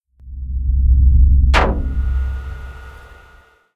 2Heavy Pulse Cannon Fire
Combined and tweaked couple of sounds from this site in Audacity and came up with this.
Pulse, bass, build, buildup, cannon, gun, laser, shot, up